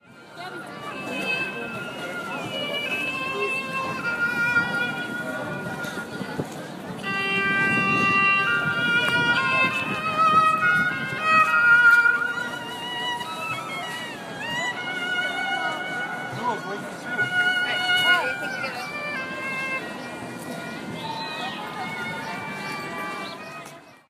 Man in small Chinatown park playing a bowed instrument.